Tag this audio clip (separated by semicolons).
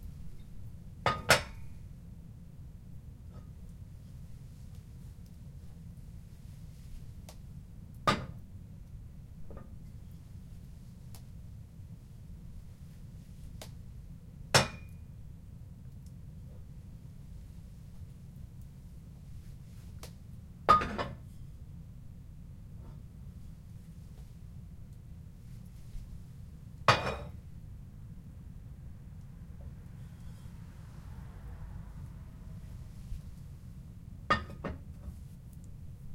placing; pan; cooking; pot; chef; stove; kitchen; fire; cook